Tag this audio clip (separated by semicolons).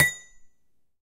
clang; dish; hit; hitting; impact; metal; metallic; percussion; percussive; ring; ringing; spoon; ting; wood; wooden